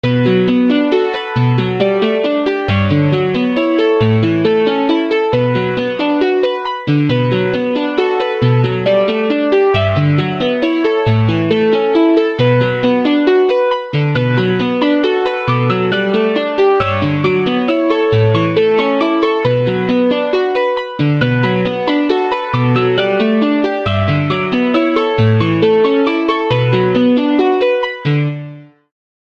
Keyboard Melody
music, Loop, Keyboard, Piano, melody